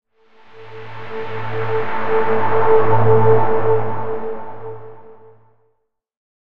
amplified stereo recording of a note (la) in fl studio sim synth
dark, effect, fx, hidden, mystery, sound, suspense, synth